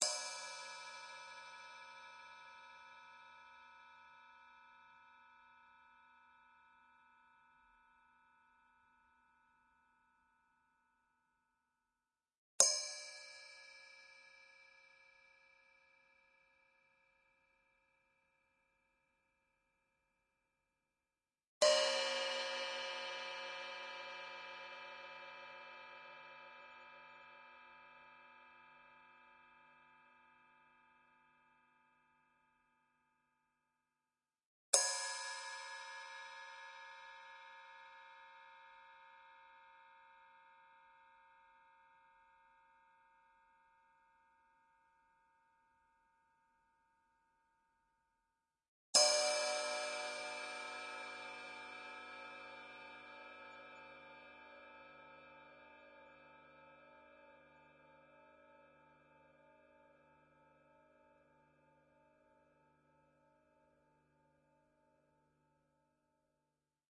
5 Ride Cymbal Magic Sounds
bell, bells, clink, Crush, Cymbal, jingle, Magic, Ride, tinkle